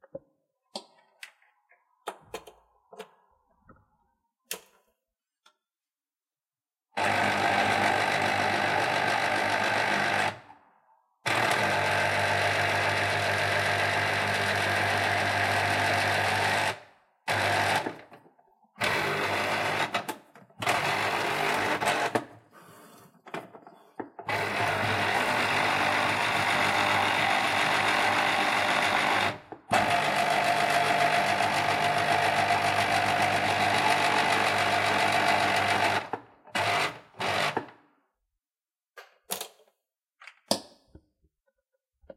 making juice (foreground)
Sound of someone making orange juice. He uses an electric juicer. He is in the open kitchen. This sound has been recorded with a zoom recorder and it has been retouched eliminating background noise and it has been recorded right next to the machine.
fruit, orange